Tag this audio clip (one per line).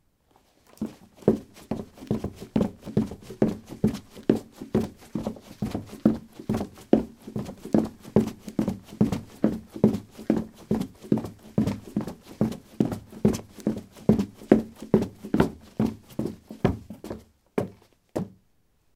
footsteps
steps
run
running
footstep
step